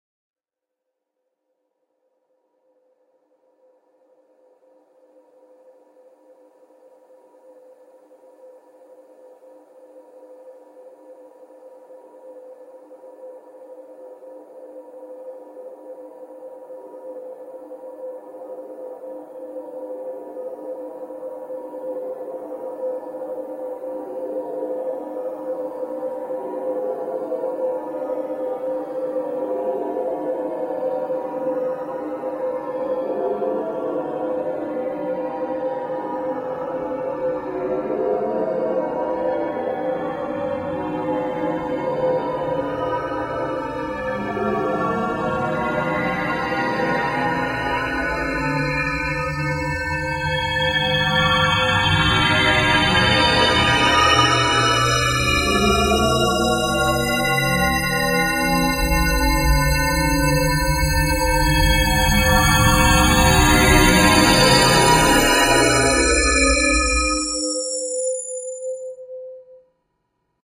Using the white spray with the harmonics on I drew two squiggly lines across the image horizontally, then flipped the image 90 degrees counter-clockwise, then with a wide dark brush I darkened some areas of the image to make the sound progress for a narrow band continuous noise to a full bandwidth squiggly kind of noise.